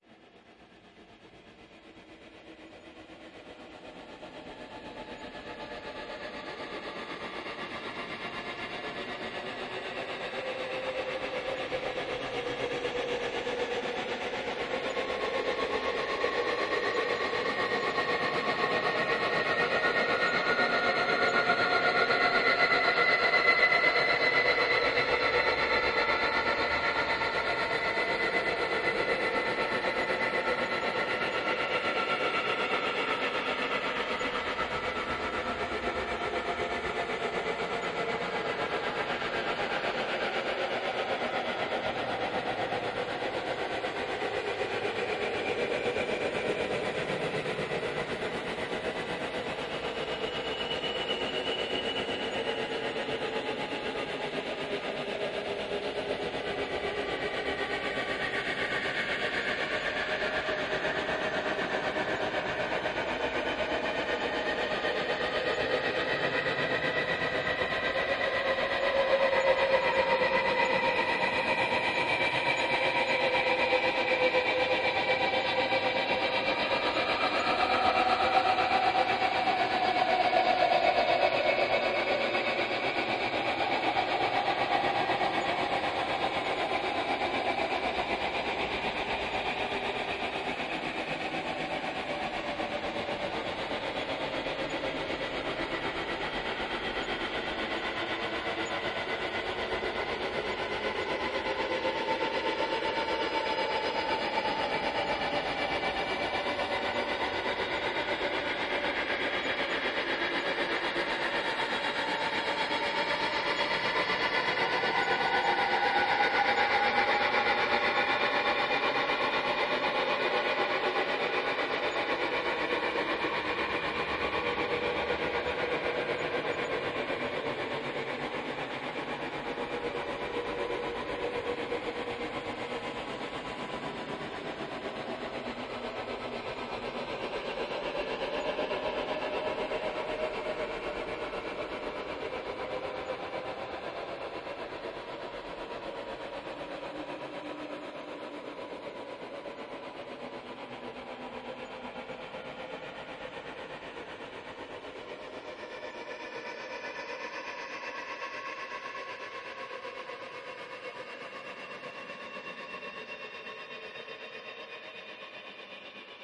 Random timestretch
Random stretch I did once using PaulStretch.
granular, paulstretch, timestretch